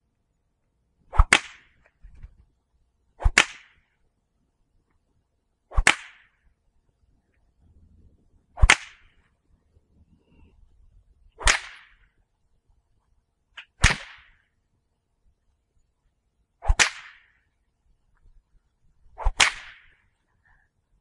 Whip Cracks
They're here! My whip pack is finally completed and up on the web for all to use! Enjoy, and spice up that western!